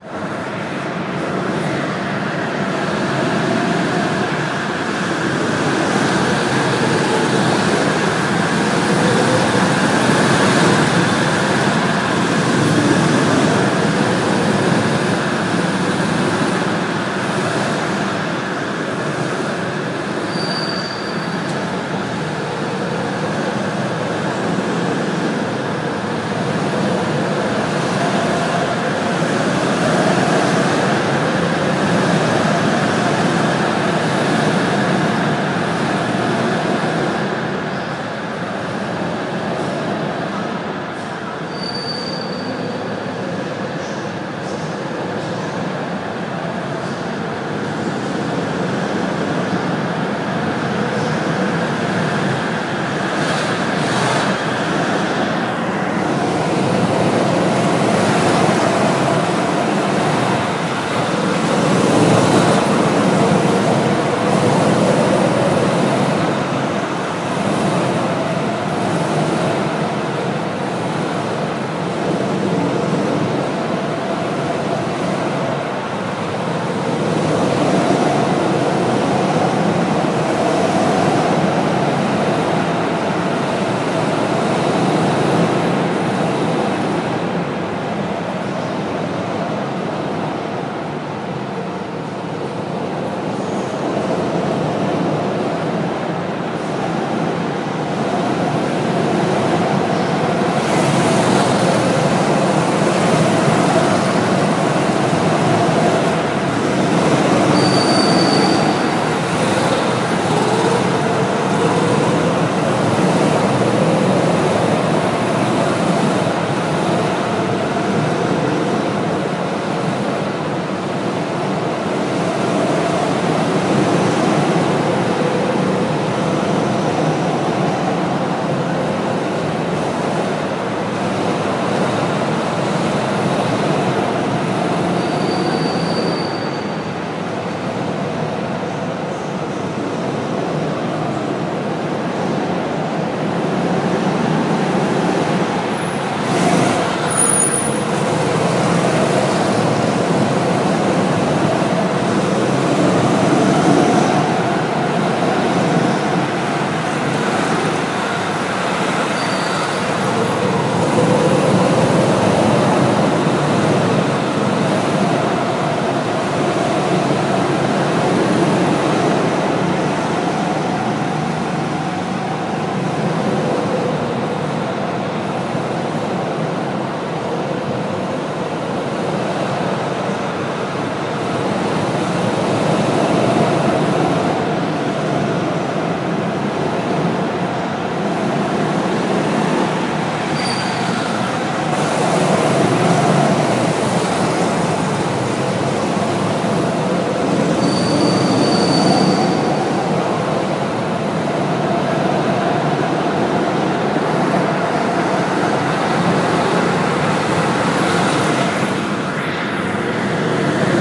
Indoor Go-Kart
Sounds in an in-door go kart racing place.
Recorded with iPodTouch 4G. Edited with Audacity.
racing, vehicles, tyres, tire, skidding, automobile, go-kart, squeak, squeaking, motors, slide, driving, race, kart, engine, go-cart, drive, vehicle, tires, motor, engines, fun, gokart, berlin, skid